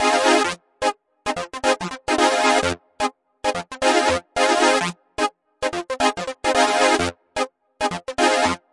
F Minor Solo Synth Funk Loop 110bpm
Funky solo saw synth loop to beef up your groovy track! I've made one in every minor key, all at 110bpm for maximum percussive funk!
funk, synth